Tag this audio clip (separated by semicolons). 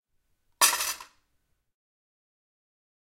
Panska; dishes; Czech; CZ; Pansk; canteen; drink; eating